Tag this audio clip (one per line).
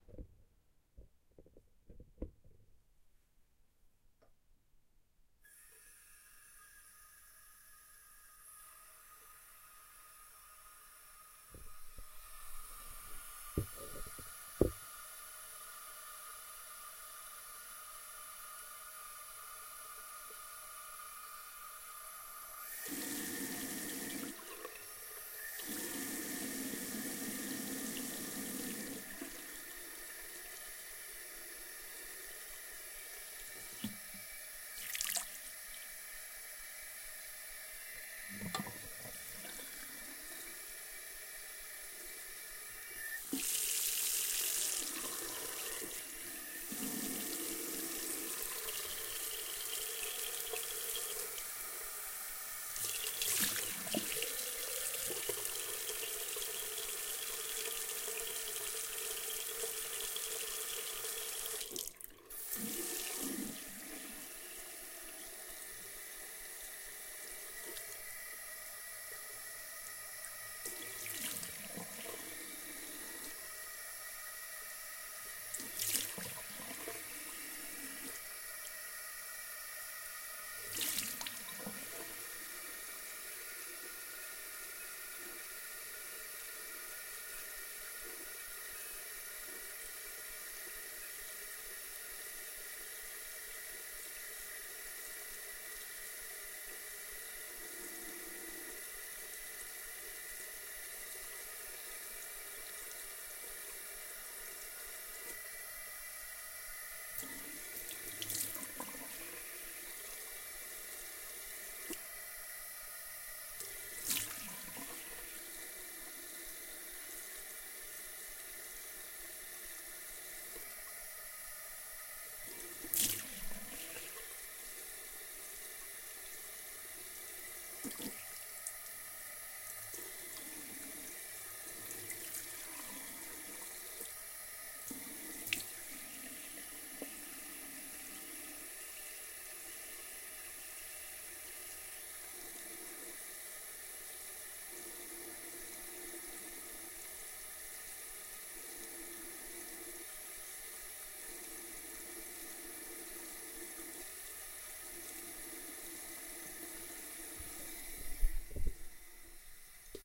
Bathroom
sink
tap
water